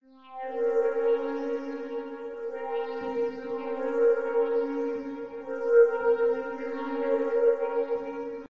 faraway pad (80 bpm)
an singing pad.made in ableton
ambient, pad